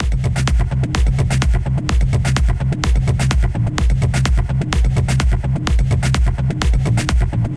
Techno drum loop 001
techno drum loop at 127bpm. 4bars
loop, drumloop, samples, Techno, 127BPM